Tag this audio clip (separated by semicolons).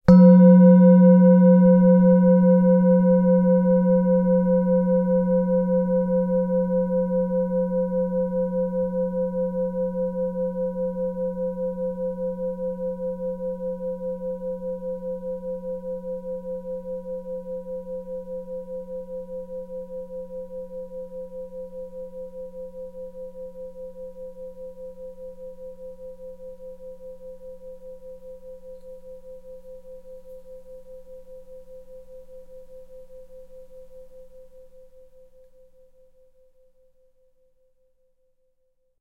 soft-mallet; singing-bowl; Zoom-H4n; record; mic-90